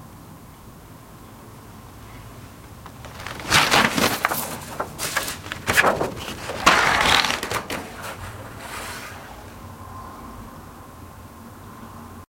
Turning pages in a book OWI
Recorded with rifle mic. Turning pages of a large book.